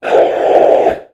A sinister low pitched voice sound effect useful for large creatures, such as demons, to make your game a more immersive experience. The sound is great for making an otherworldly evil feeling, while a character is casting a spell, or explaning stuff.